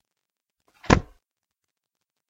Sound effect of a book closing